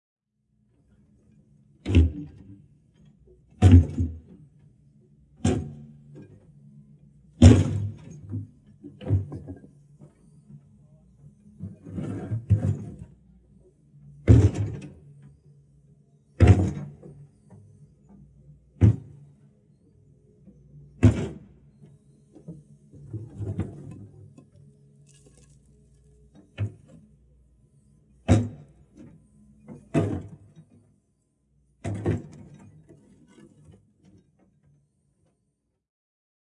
Digger smashing concrete (edited)
Edited version of "Digger smashing concrete".
Used EQ and noise reduction to eliminate as much background as possible to create a rich, isolated sound of metal smashing concrete.
site, break, concrete, boom, machine, construction, pipes, destroy, field-recording, digger, water, crash, smash, industrial, building, mains